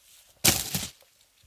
Sounds of throwing something made by putting the microphone through some leaves in a forest. If you like my sounds - check my music on streaming services (search for Tomasz Kucza).
forest, leaves